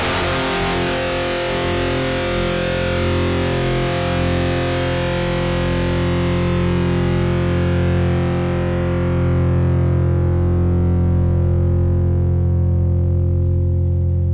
REV GUITAR LOOPS 13 BPM 133.962814
all these loops are recorded at BPM 133.962814 all loops in this pack are tuned 440 A with the low E drop D
13THFLOORENTERTAINMENT, GUITAR-LOOPS, 2INTHECHEST, DUSTBOWLMETALSHOW, HEAVYMETALTELEVISION